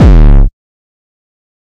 Hardcore Bass 2
Powerfull bass. Enjoy!
bass, gabber, hardcore, party, trance